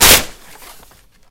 ripping a paper bag
bag, paper, rip, tear